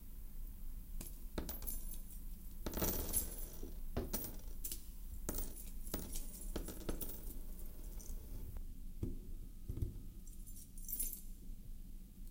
a game of quarters being played.
change, coins, quarters